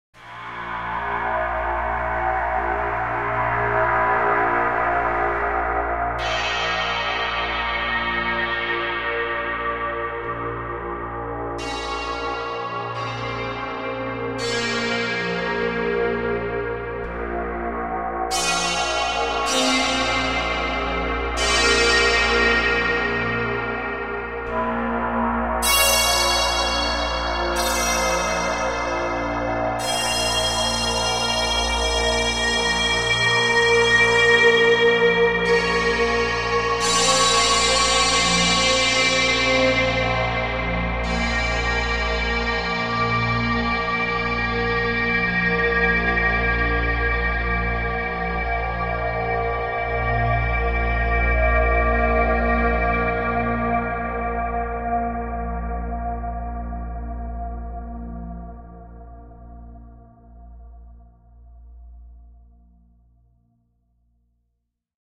Modal Synthscape
A synthscape created using modal sound synthesis.
soundesign, synthscape, effect, sinister, drama, sound, soundscape, suspance, transition, modal, thrill, cinematic, film, fear, suspence, movie, synthesis, horror, terror, bakground, criminal